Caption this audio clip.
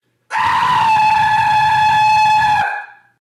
A person screaming from across the room in a large open area.
scream, horror, shout, screaming, yell